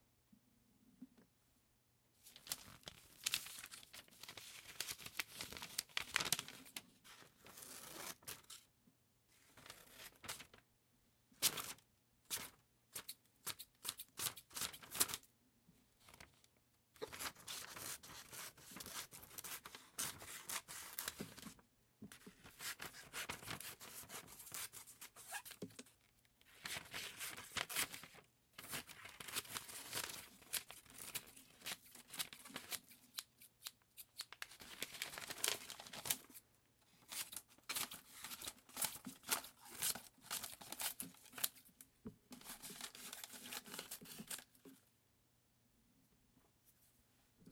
Scissors cutting a thin sheet of paper at various speeds.